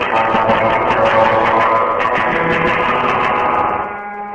Another good old-fashioned guitar sample.